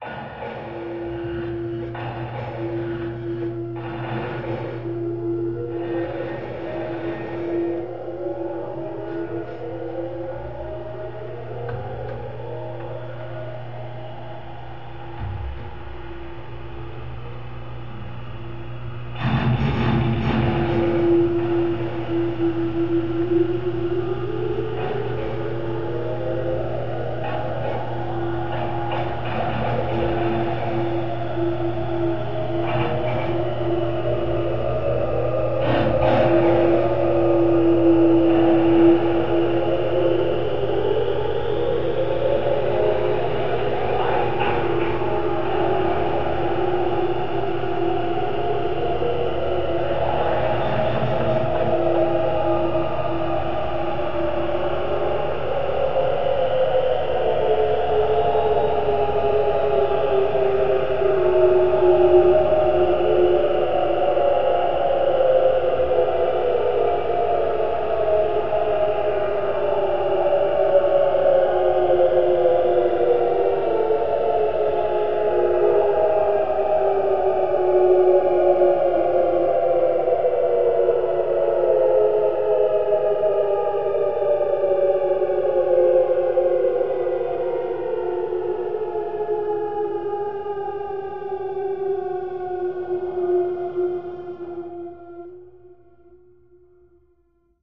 hawnted halo
took two samples from circuit bent devices created by friend mark murray and convoluted them together in sound forge...
atmospheric, banshee, brain-slugs, eerie, ghost, horror, processed, space